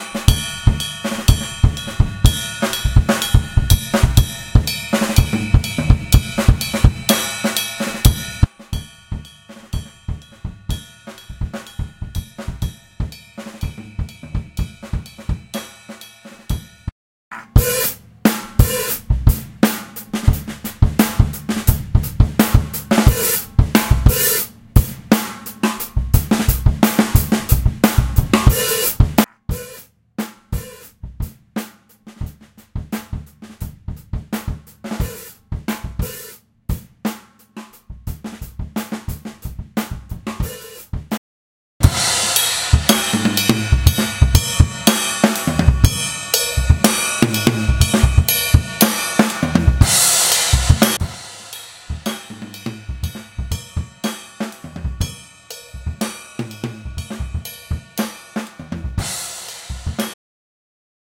Three quick samples of the Gear One MK1000 on kick (+ a single Audio Technica AT2020 overhead on the rest of the kit). Recorded straight into a Tascam US-1800 in a lousy room with a less-than-optimal 22" Mapex kick drum. Each quick loop / snippet is 1) presented in mono / processed with compression and some EQ (mostly a 6dBish scoop at around 300Hz on the MK1000 and 80hz rolloff on the 2020 with about 5dB less on the MK1000 than the 2020), then 2) presented EXACTLY as recorded / NO processing at all save normalization, MK1000 panned hard left channel, AT2020 panned hard right. The first two samples are the MK1000 in the resonant head cutout, the third is the MK1000 positioned about 4" from the head and just about 2" from dead center of the drum.